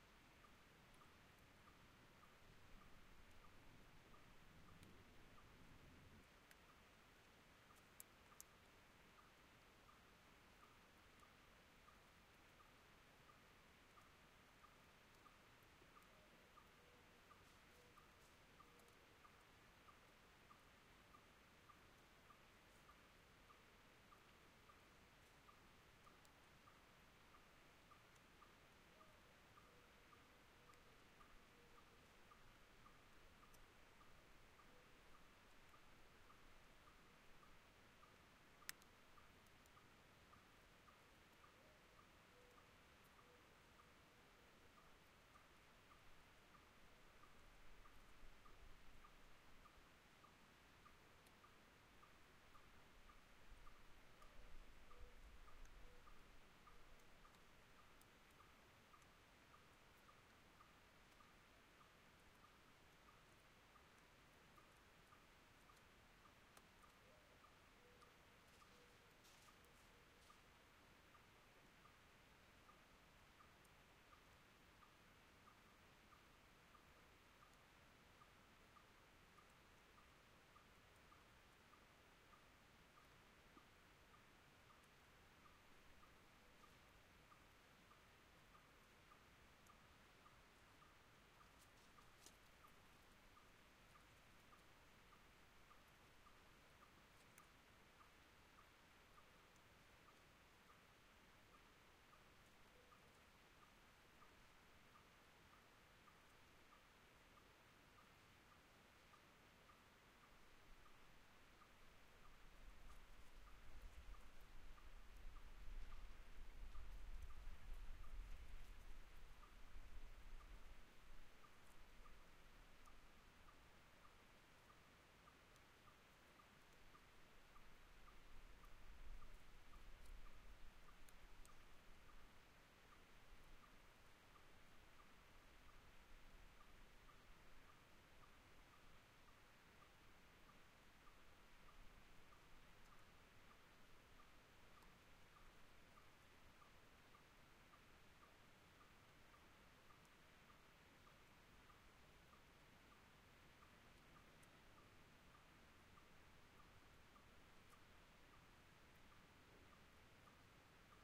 Silence and a Solitary Bird
This is a loop made at Berry College in Rome, GA. I wanted to capture some of the feeling of quiet, but there was a bird in the distance making a rhythmic sound that I liked.
bird, silence, loop, field-recording